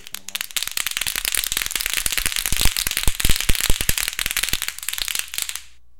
Kid playing with a noisemaker that makes clapping noise. I think I processed it to make more.
kid, noisemaker